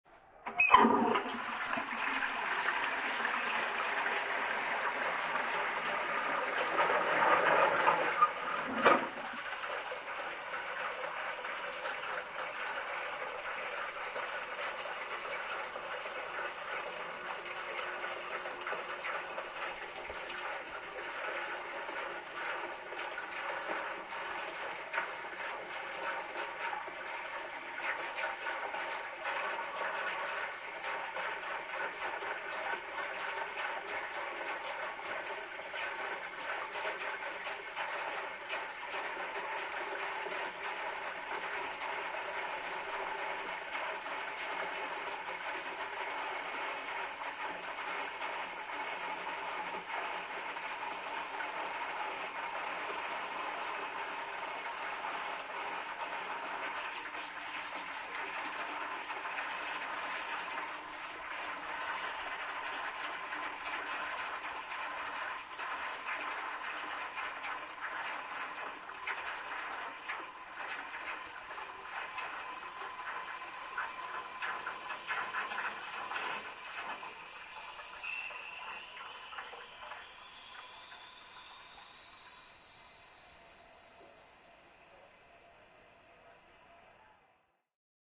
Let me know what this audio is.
old chain flush toilet flushing 2
This is the second recording of The sound of an antique toilet flushing, by the action of pulling on a rusty chain. Toilet flushes then refills. Microphones are closer to the toilet giving a wider stereo image. Recorded on Jan 27th 2008 with the olympus WS210S digital stereo recorder in St.hellens, Lancashire, United Kingdom.